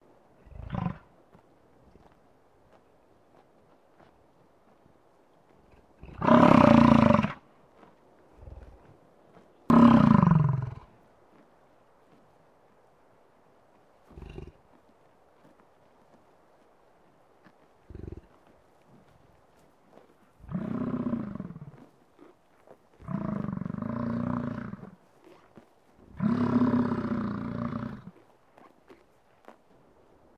Bison bellowing - Yellowstone National Park
bison browsing on grasses as well as bellowing.